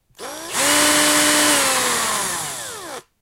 Nut Gun Quick Rise Slow Fall
Bang, Boom, Crash, Friction, Hit, Impact, Metal, Plastic, Smash, Steel, Tool, Tools